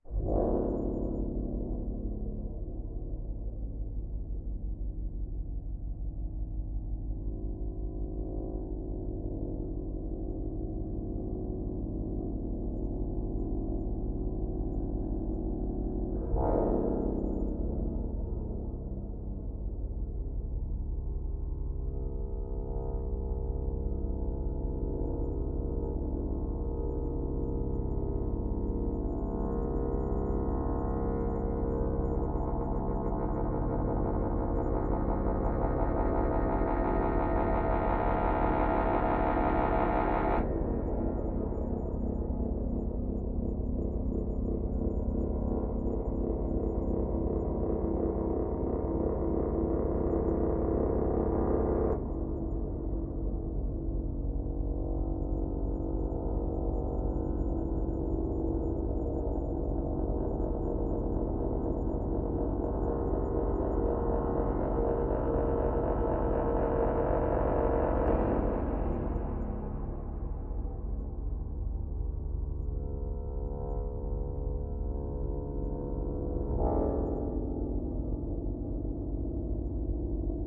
BZH SlowDrone104BPM
Transposed trumpet sounds layered.
drone, creepy